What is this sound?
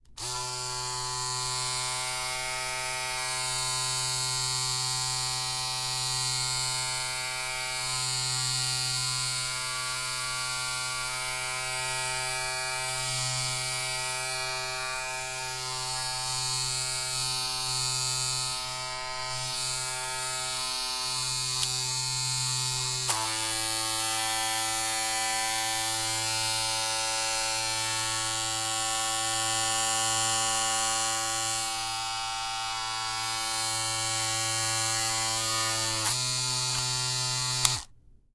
A couple different settings on an electric razor to create buzzing sounds.